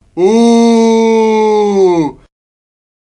buu loser shout
loser, scream, buu